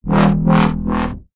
Dub Wah E3 3x 140bpm
A wahwah saw made in Sytrus (FL Studio). 140bpm in E3. Left raw and unmastered for your mastering pleasure.
wahwah, warble, studio, saw, fx, wah, rough, sytrus, effect, dubstep, synthesizer